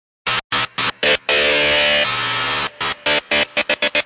chopped D power chord 118bp
a "D" Power chord chopped up and processed logic
chord, fx, guitar